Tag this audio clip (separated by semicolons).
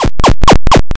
game; 8; SFX